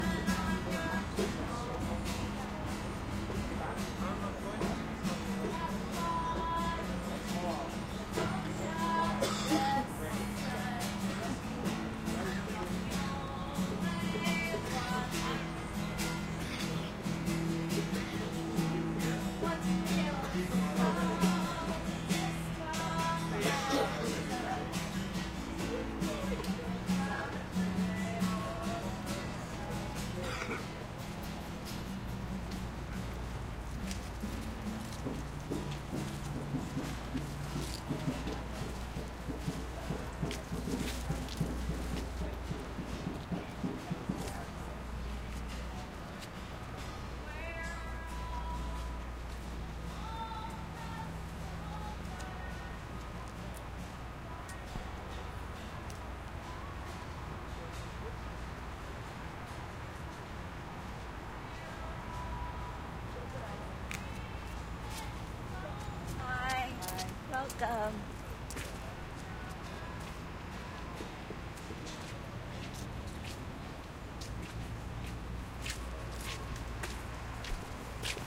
Occupy Toronto St James Park 2 11 Nov 2011
Another clip recorded at the Occupy Toronto base camp in St James Park in downtown Toronto, 11 Nov 2011. You can hear the sounds of the occupy protesters as we walk through the park.
Roland R05 sound recorder and Sennheiser MKE400 stereo microphone.
field-recording, St-James-Park, Toronto, Canada, street-protest, Occupy-Toronto